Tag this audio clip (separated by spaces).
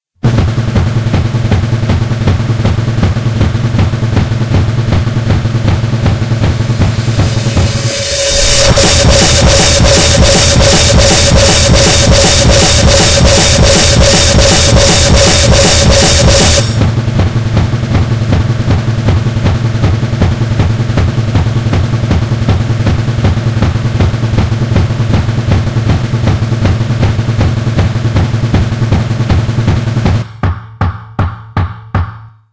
beat dance house